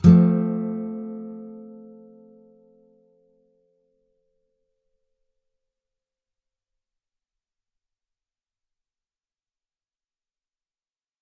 F Major. A (5th) string 8th fret, D (4th) string 7th fret, G (3rd) string 5th fret. If any of these samples have any errors or faults, please tell me.
acoustic, bar-chords, chords, guitar, nylon-guitar